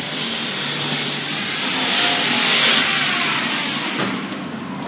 field recording of the noise of a grinder, as captured in a glass workshop / ruido de una pulidora de vidrio en un taller
machine, tool